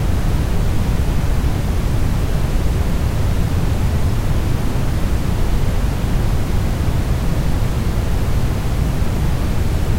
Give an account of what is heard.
Artificial Waterfall created with Audacity
waterfall, cascade